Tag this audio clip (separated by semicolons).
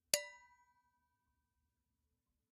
Domestic; Fork; FX; Hit; Hits; Kitchen; Knife; Loop; Metal; Metallic; Pan; Percussion; Saucepan; Spoon; Wood